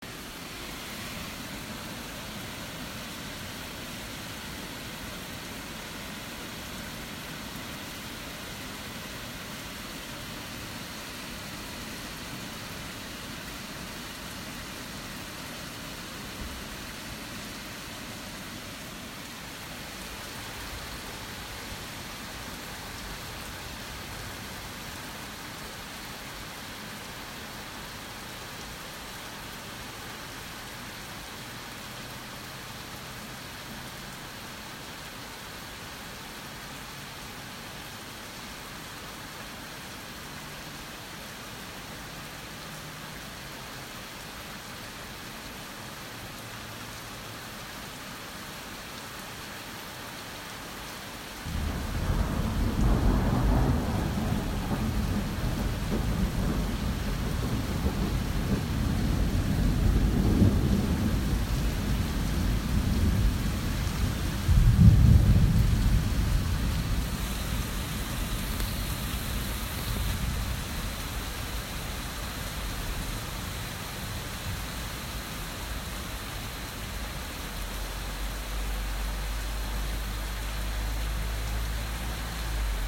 Heavy rain and thunderstorm
Recorder on 28 Dec. 2020 at night.